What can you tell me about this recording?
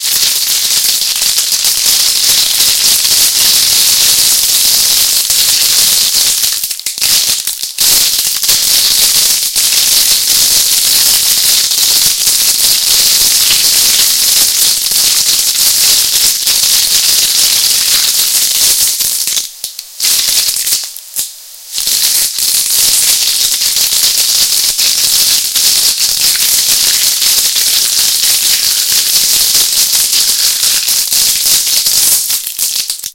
Electric Sparks 1
[Warning: MAY BE LOUD! Check volume if necessary!]
Technology failing? Circuitry overflowing with too much electricity? Computer burnt out? This sound clip will amplify the purpose of zapping out loud that the technology just had enough!
(Recorded with Zoom H1. Mixed in Cakewalk by Bandlab)
Electricity, Electronic, Sparks, Zap